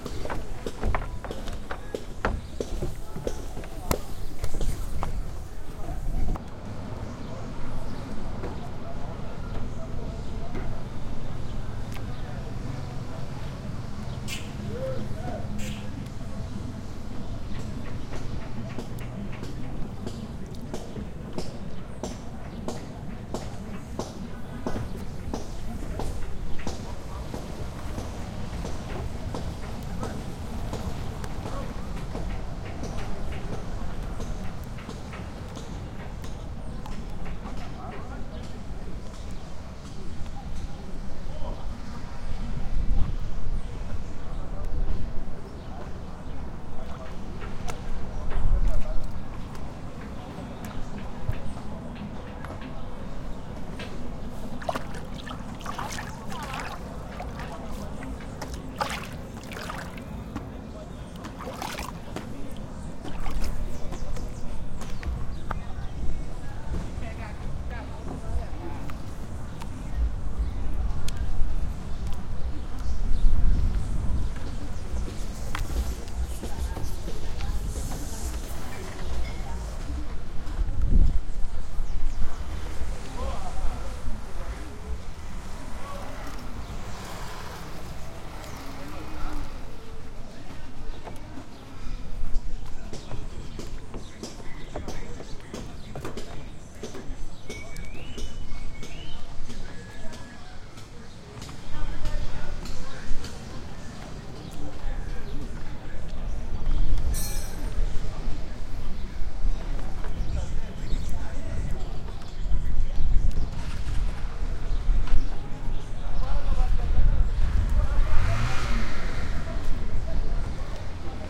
Pier na 25
Longitude: - 38.96345258
Latitude: - 12.60617109
Elevação: 5 m
Local: Pier
Bairro: Centro
Data: 10\06\16
Hora: 10:02
Descrição: Carros passando na rua, oficina de motos e agua
Gravador: Sony D50
Tags (palavras-chave): cachoeira Pier Agua e Oficina
Duração: 01:45
Autor: Gilmário e Wesley
25, brasil, cachoeira, cars, motor, pier, water